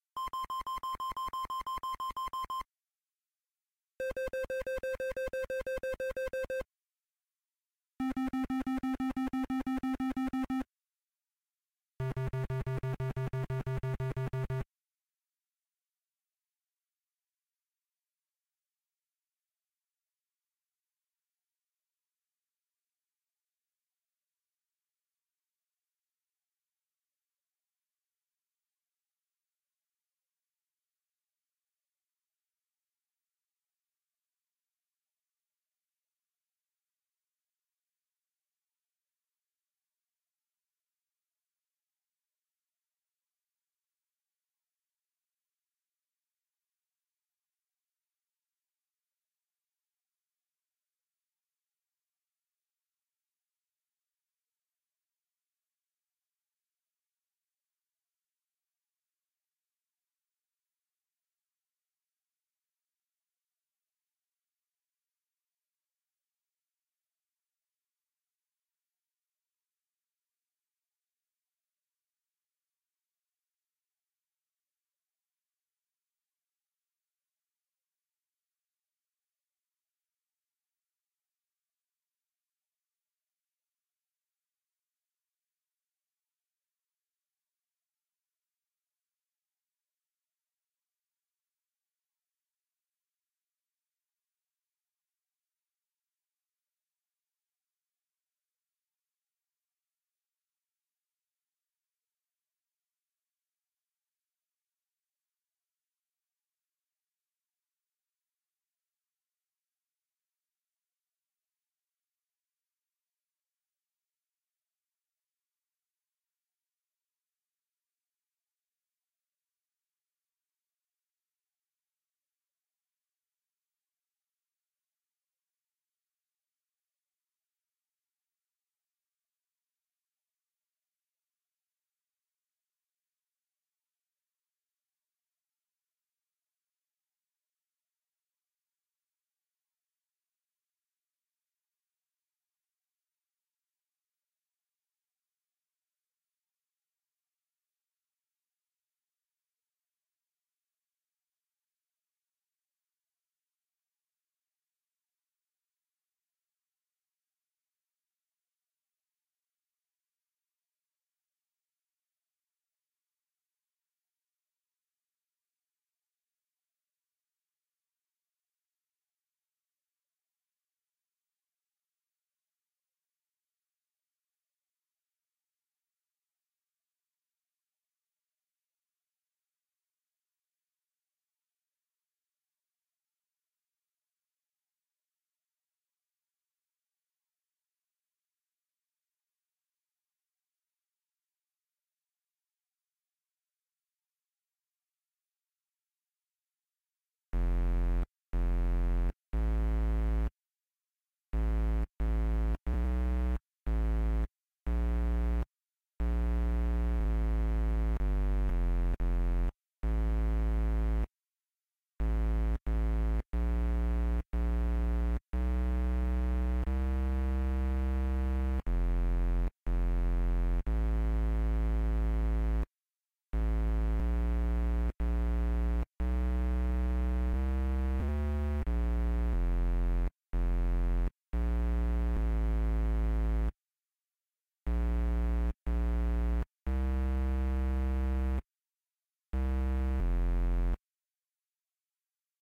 Target Lock 90 bpm Beep
A target lock beep (in my mind similar to the beeps heard in the Death Star strategy session in Star Wars) playing at 90 BPM.
Weapon, Sci-Fi, HUD, Action, Laser, Lock, Target